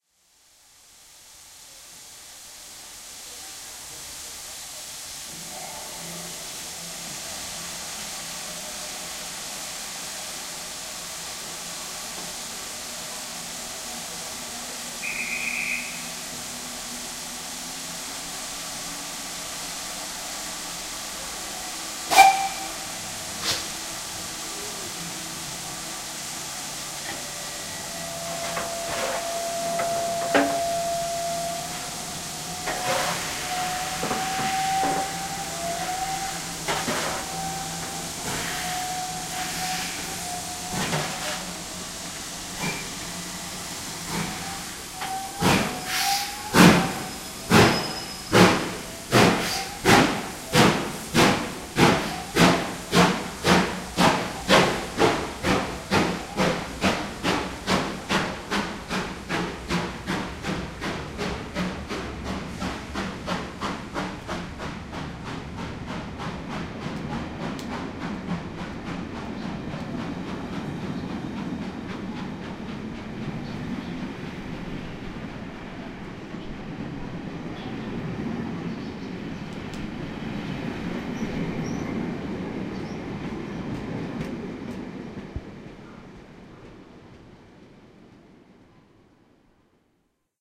steam-train-leaving-moor-st-station
Stereo recording of a steam train leaving Moor Street station in Birmingham in the direction of Snow Hill station, going into a short tunnel as soon as the engine leaves the platform. 4-6-0 Hall class locomotive pulling a train of vintage carriages. Steam hiss, guard's whistle clearing the driver to leave the platform, coal being shovelled into furnace, piston starts to move, speeds up, train whistle (brief), sound of the steam locomotive fades and carriage bogey noise.
Recorded on a Zoom H2 with built in microphones, in 'mid' sensitivity level, hand held.